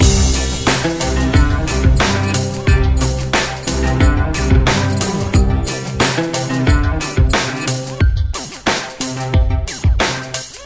Country song008
synthesizer, country, dubstep, loop, hip-hop